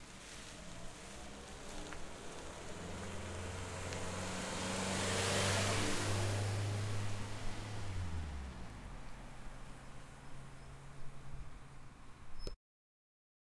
The sound of a car driving past outside on the road